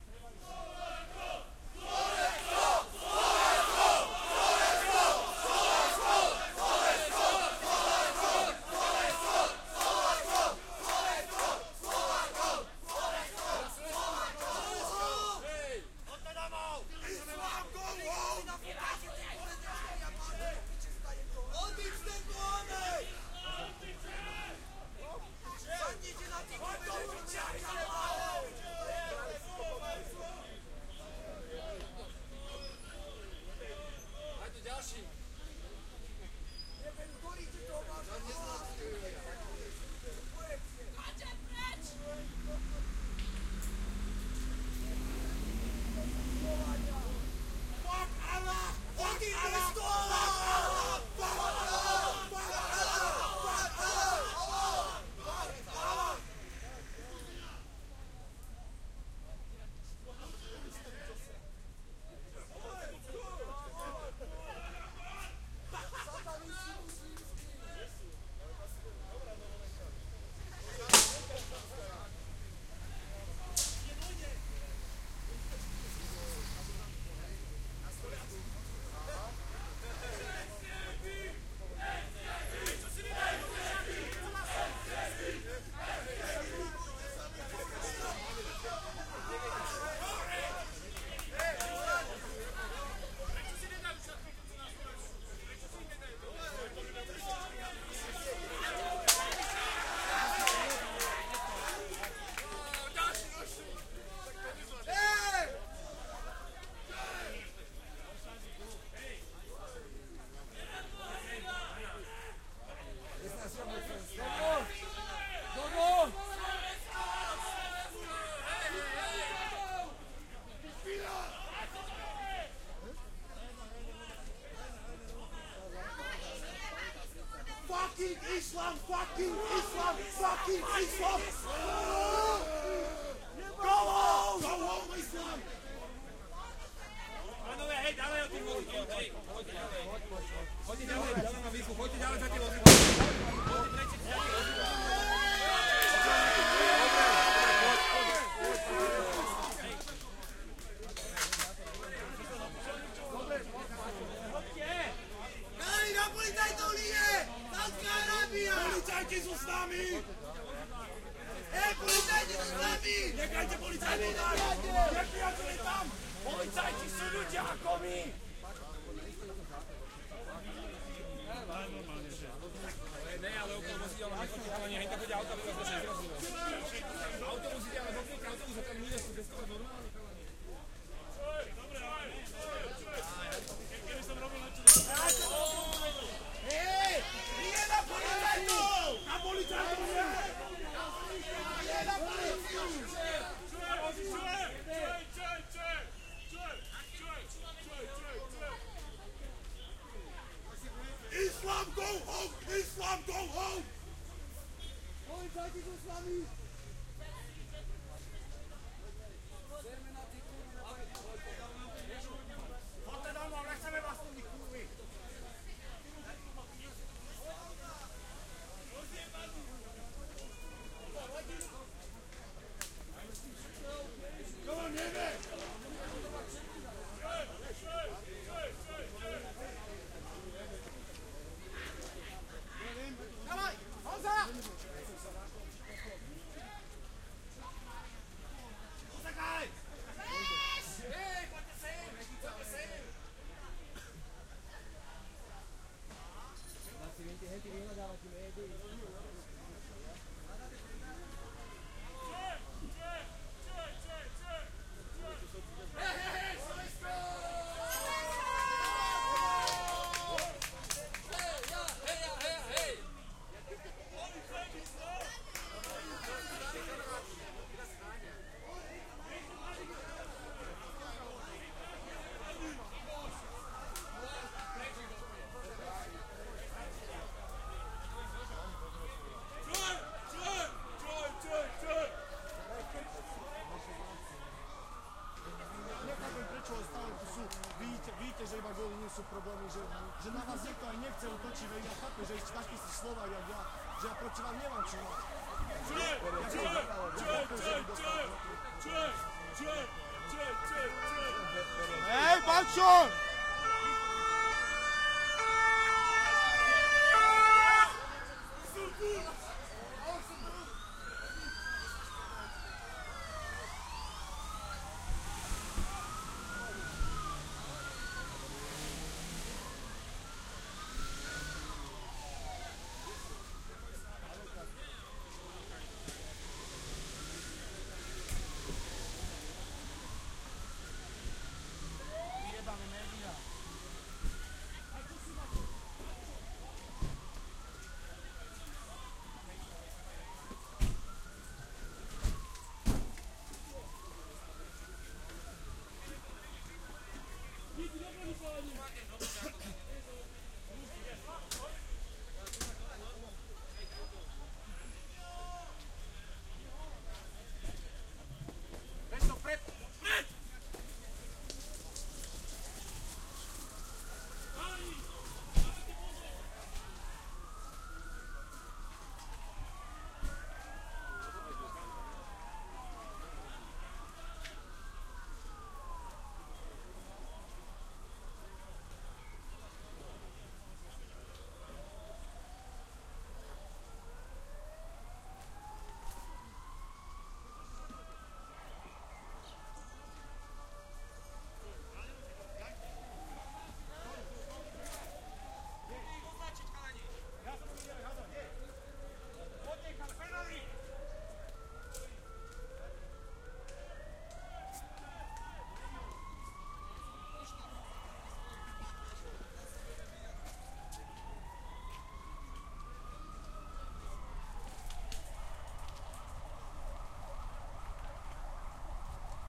20150620 Bratislava protest 01
nazi skinheads in action 01, protest against islamisation of europe, bratislava-slovakia, main trainstation 20150620
binaural, documentary, police, protest, screaming, skinheads